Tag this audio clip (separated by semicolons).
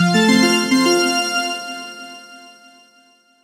levelup; success; victory; winning